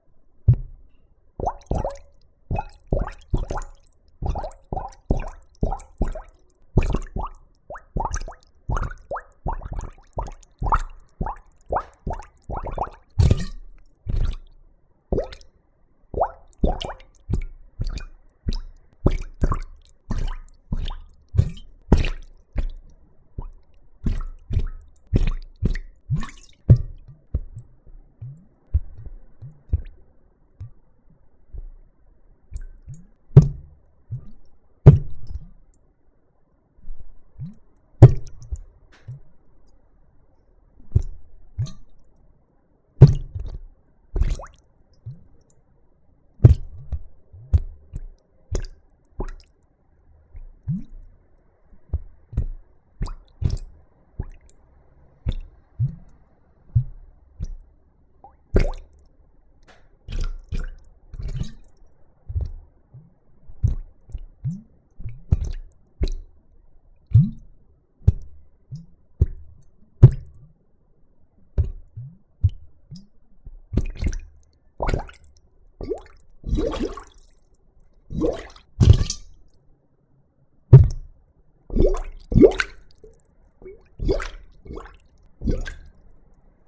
The sound of me placing a large ceramic bowl into a sink full of water in various ways. Be aware some of these are really loud, so be careful! Recorded with an AT4021 mic into a modified Marantz 661.
blub, liquid, water, gurgle